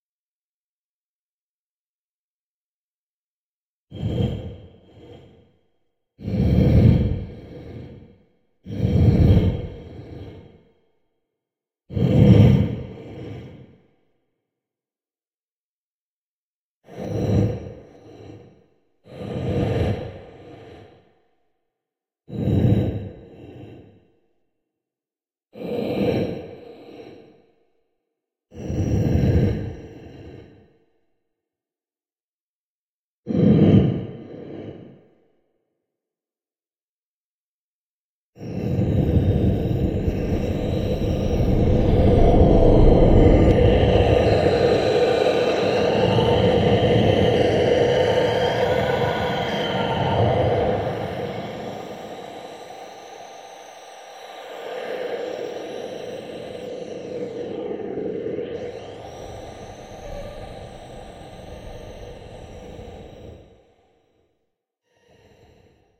signalsounds for dark scary sound design